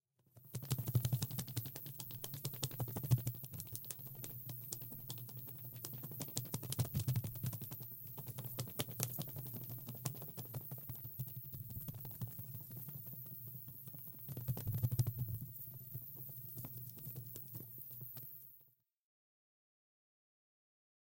38 hn batwings
Bat wings flapping. Made with thick paper sheets and voice recordings
wings, bat